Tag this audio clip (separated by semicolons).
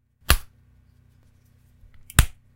Hit; Slap; Punch